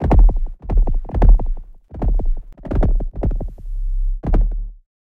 SPS 1 Machinedrum processed bz Eventide H3000 DS/E
eventide, percussion, drum, machinedrum